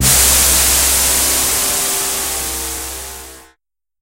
FM Crash Cymbal 1
Using AudioSauna's FM synth, an emulation of the Yamaha DX21, I have created a complete/near-complete percussion kit which naturally sounds completely unrealistic. This is one of those, a crash cymbal, the first I created.
crash, cymbal, FM, percussion, synth